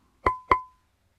Frying Pan 2xKnocks
the sound of knocking on a small frying pan, twice—like knocking on a door. might sound like knocking on an armor breast plate.
armor, knock, pan